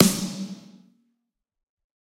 Snare Of God Wet 025
drum, realistic, set, snare, pack, drumset, kit